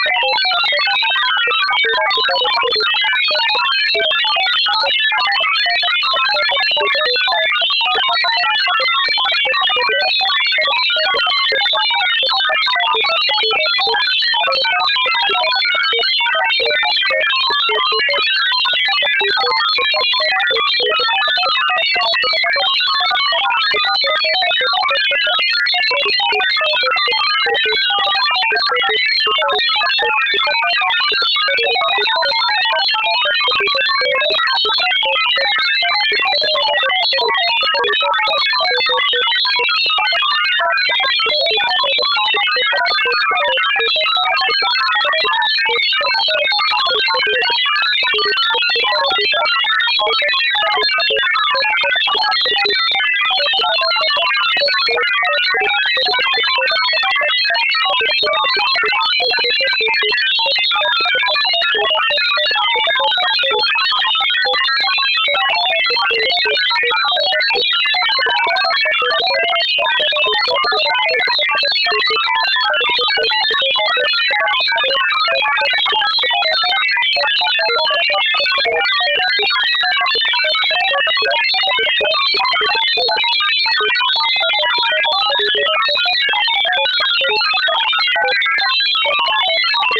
testing new generator. this one seems to imitate robot talk sfx.